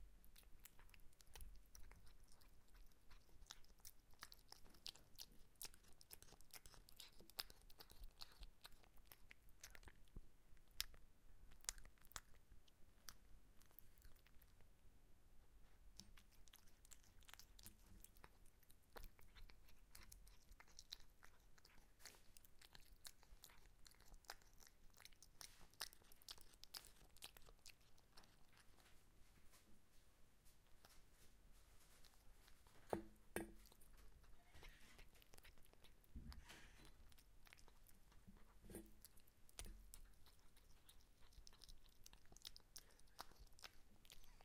A cat eating its meal. Recorded with a Zoom H1.
animal, cat, chew, chewing, eating, mash, mouth, pet, zoom-h1